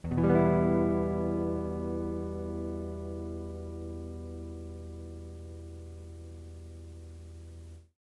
Tape El Guitar 9
Lo-fi tape samples at your disposal.
Jordan-Mills, lo-fi, collab-2, mojomills, el, lofi, guitar, tape, vintage